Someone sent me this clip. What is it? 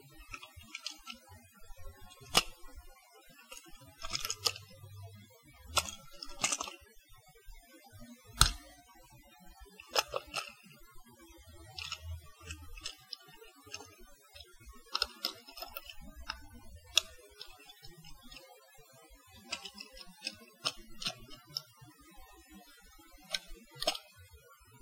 fiddling with random object
playing around with a random object to produce generic fiddling sounds
object, random